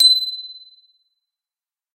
Small Bell #2
Small metal bell
Audient mic pre, Rode NTK, X Noise, low cut
Ping!
bell, chime, chiming, christmas, ding, dingalong, fairy, magic, metal, ping, ring, ringing, small, ting, tiny, tubular